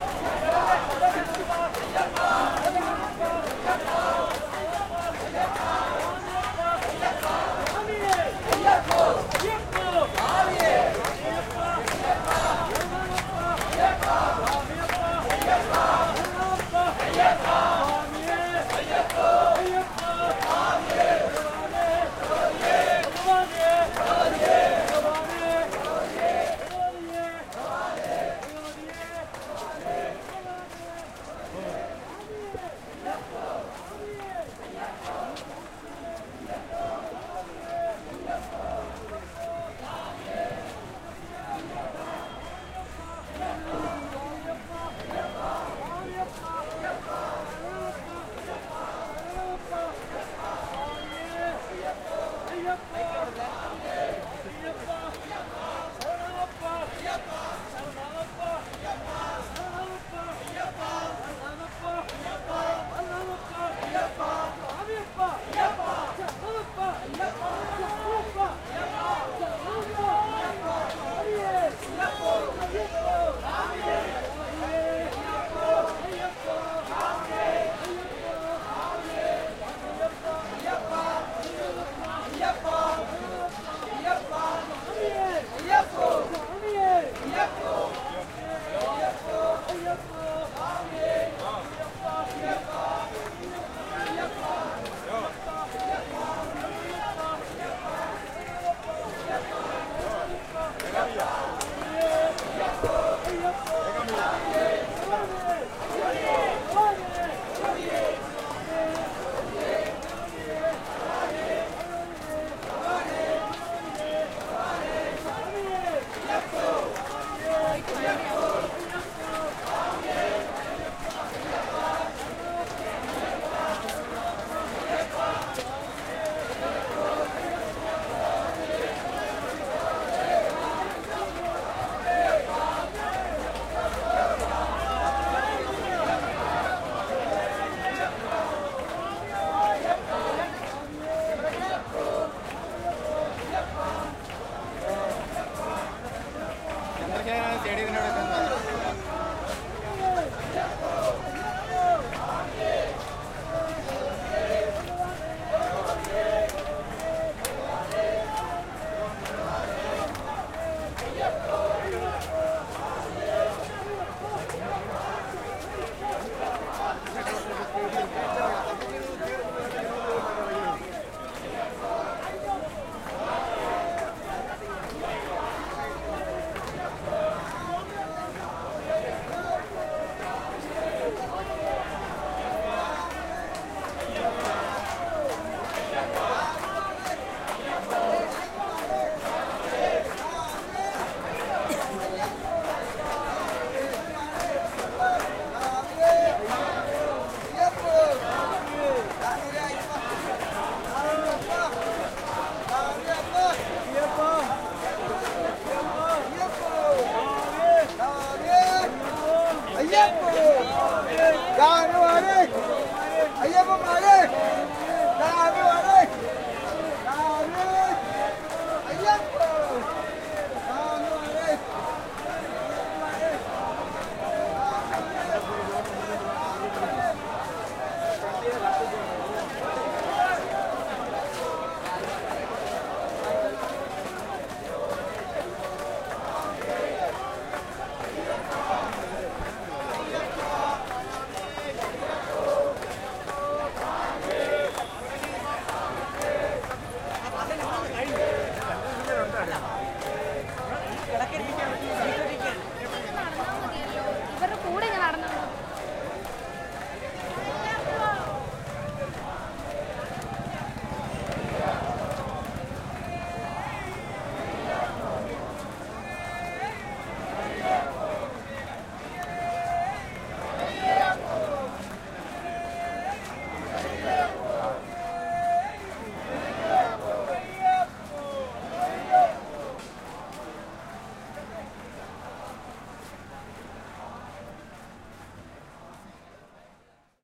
Protests on the streets of Kerala, India in January 2019